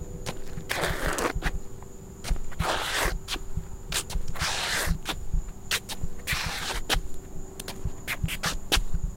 Short segment of a zombie walking on concrete.
folie, steps, zombie